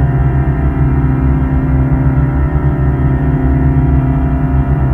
Created using spectral freezing max patch. Some may have pops and clicks or audible looping but shouldn't be hard to fix.
Freeze, Background, Everlasting, Still, Sound-Effect, Soundscape, Atmospheric, Perpetual